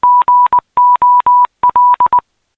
GOL morse
Signal "GOL" in morse (--· --- ·-··), very known on some spanish radio programs of live football broadcast.
football, goal, gol, radio, signal, tv